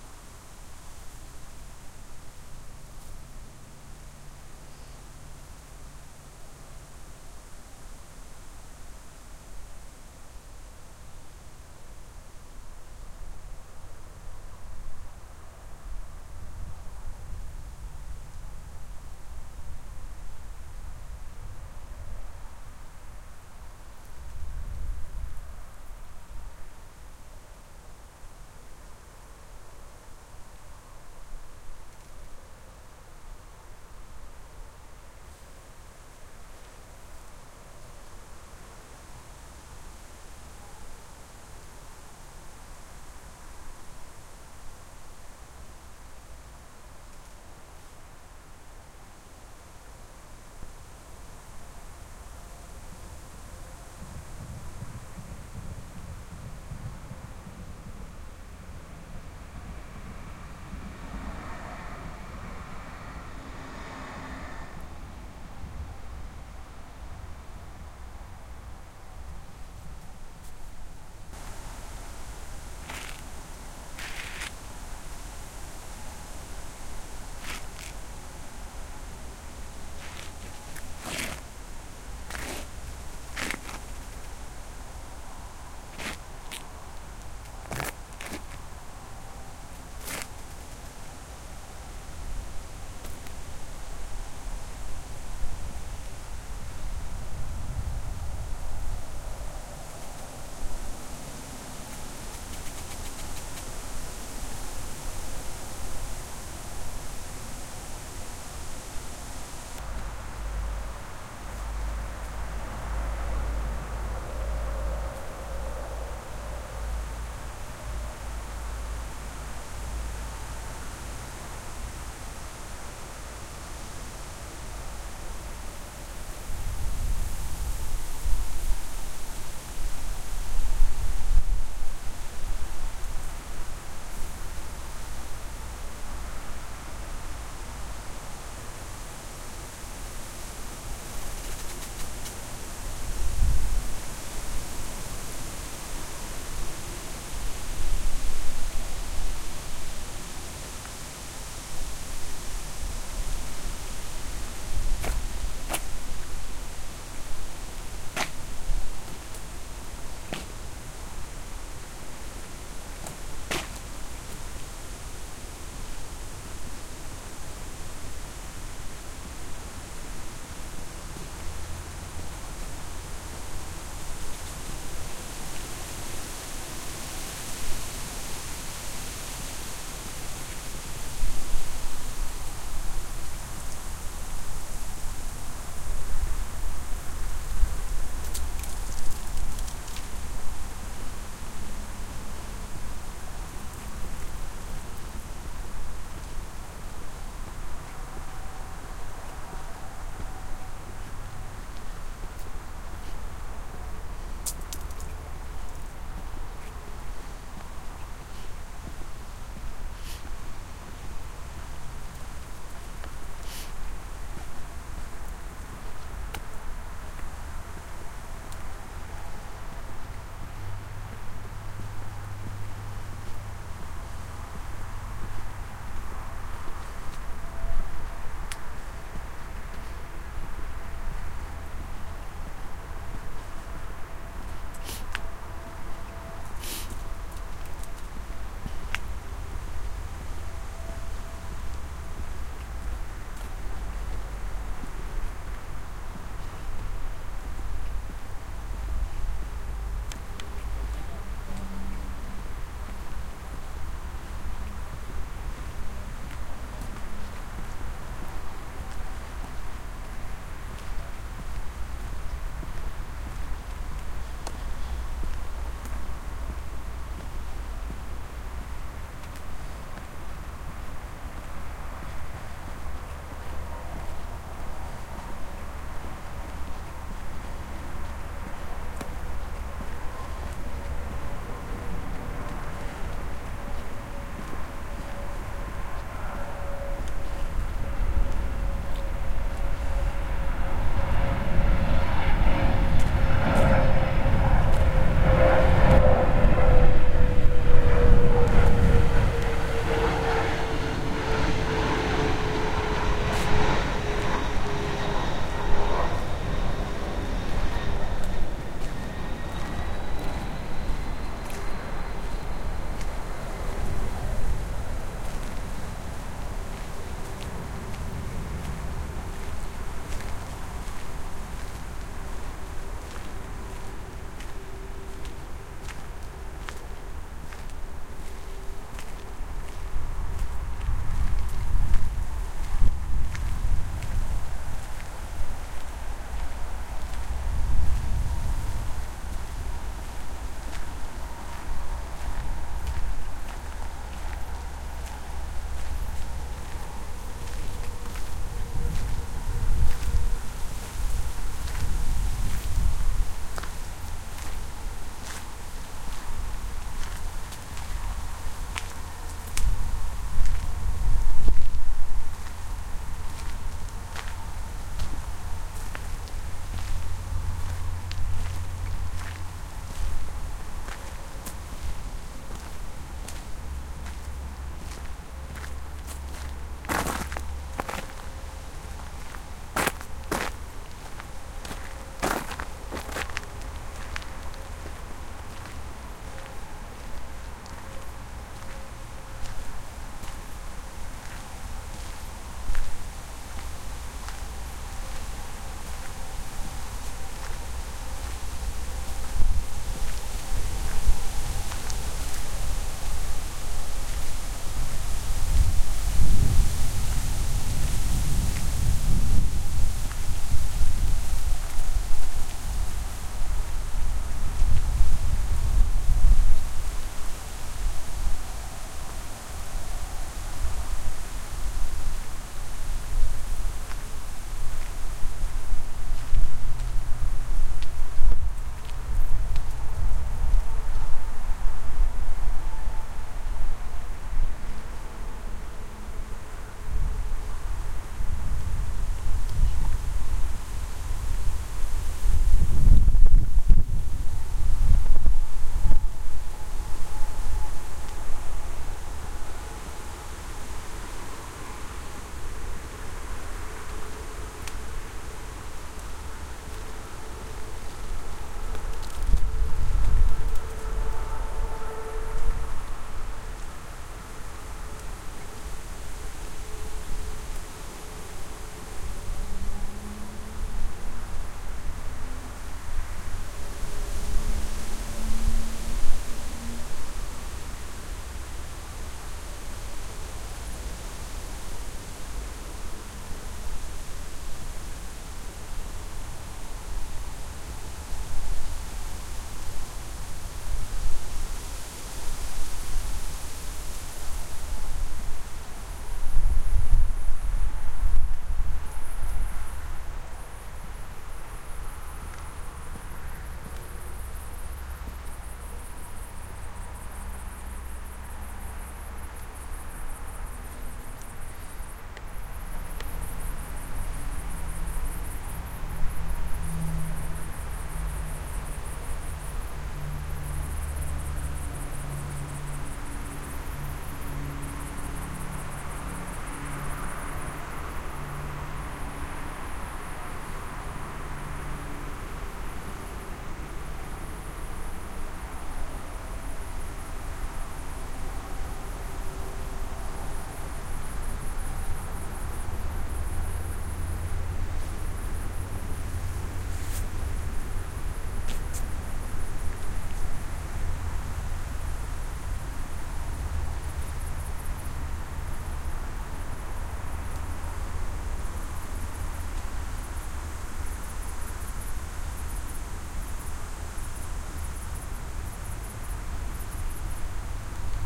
Hellerau wind 65
I made this recording while walking on a somewhat stormy night in Hellerau in September 2008. Many unexpected sounds intruded including my novice use of the microphone. I used the M-Audio Mk-II Digital
crickets, tram-bypass, footsteps, strong-wind, jet-landing, autobahn